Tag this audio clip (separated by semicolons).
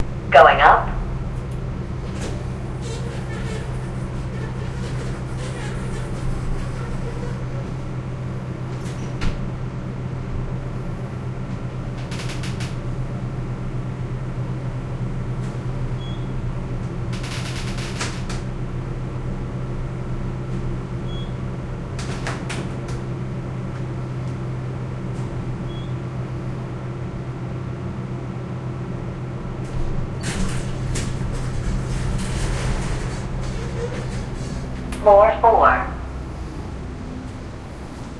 ambiance; field-recording